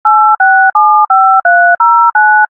DTMF tones, as if someone is dialing a phone.
telephone, dtmf, synthesized, tone, phone, dialing